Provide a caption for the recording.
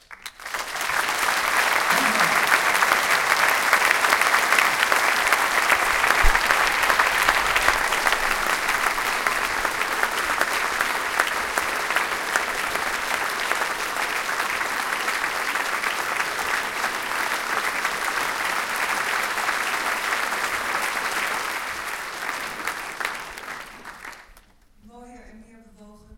Audiance applauding in concert hall.
Field recording using Zoom H1 recorder.
Location: De Doelen theatre Rotterdam Netherlands